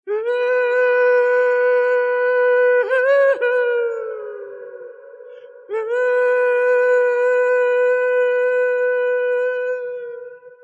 OOOooo whoo whoo
human, vocal, voice, Male